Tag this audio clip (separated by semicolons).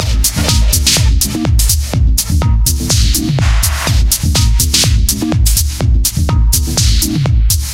124bpm; loop; techno; tech-house